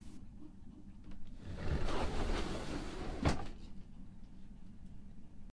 Record with ipad voice memos. Wooden slide door in my house.